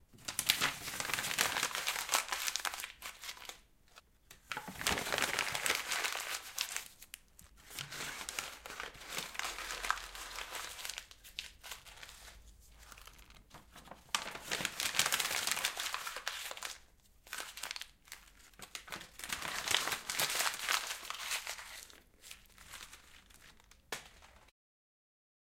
Folding paper up in different speeds and throwing it away